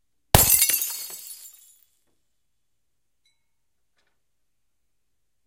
Me dropping a vase off my deck onto a concrete patio.